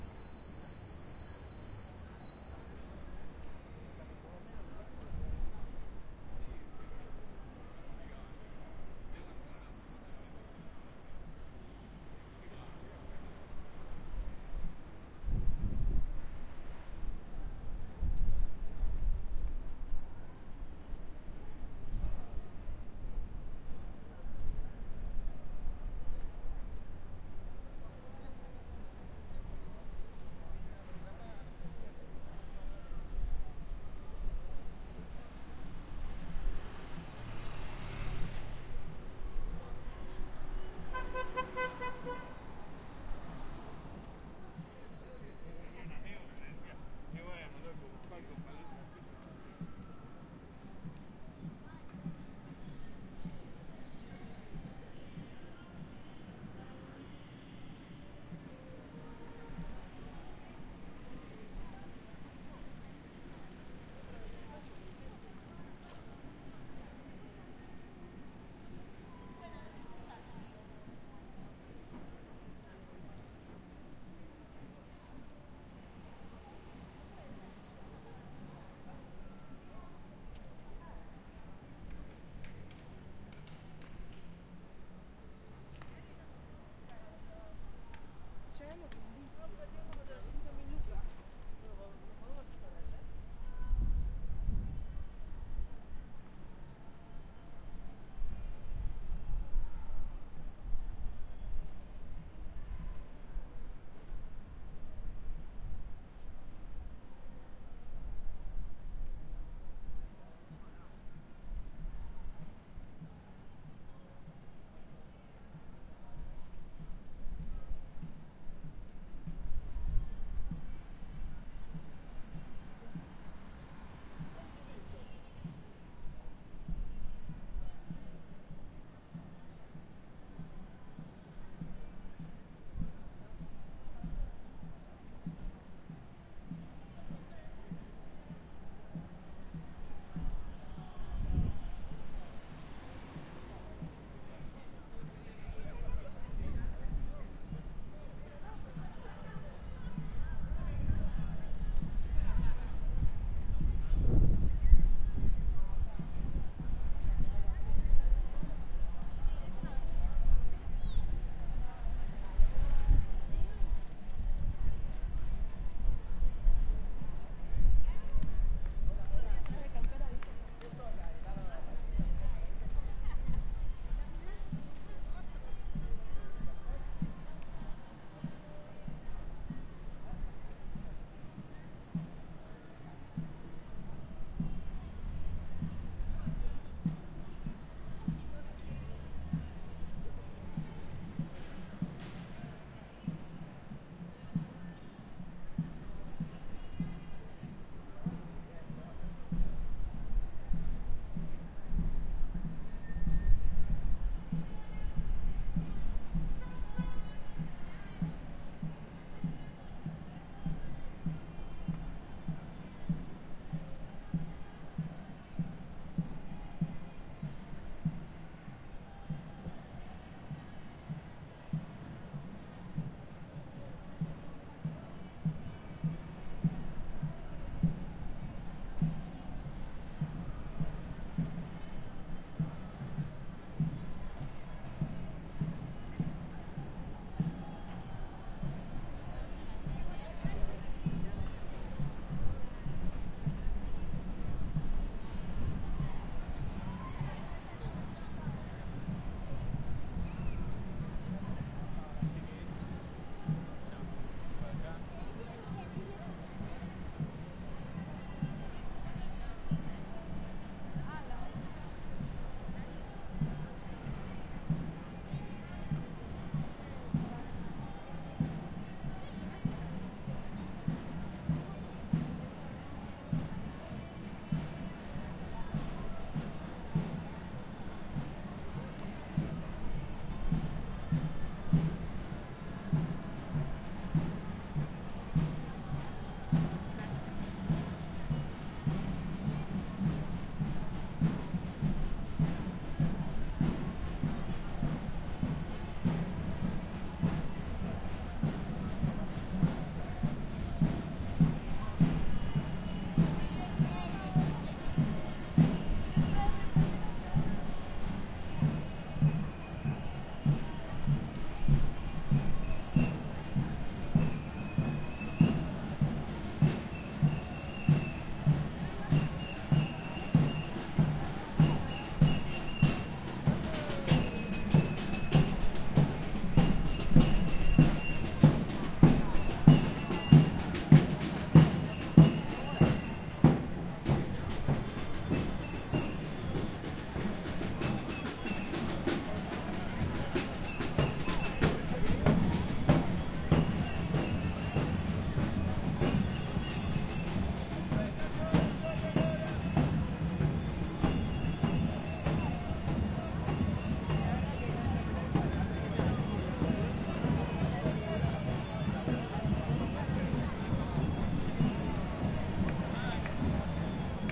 Caminando sobre la Avenida Callao desde Corrientes hasta el Congreso de la Nación, Buenos Aires.
anniversary, Avenue, batucadas, drums, murga, political, protest, protesta